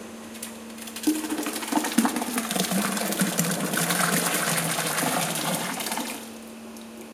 Spilling thick liquid
A bucket of thick cloggy liquid being spilt on water. Recorded with Panasonic GH4 native microphone.